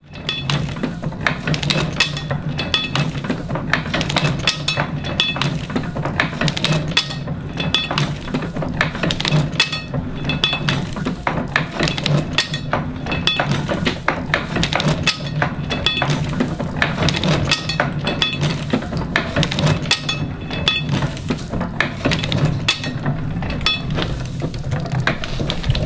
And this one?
Printing Press Mechanics
This is the mechanical sound of a 123 year old letterpress printer. It was recorded as I shot video, using a Rode Video Mic Pro, and because of the dynamics of the sound came out quite well.
mechanism, metal, industrial, clunk, printing-press, machinery, rhythm, press, mechanical